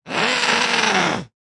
A small monster voice
beast, beasts, creature, creatures, creepy, growl, growls, horror, monster, noises, processed, scary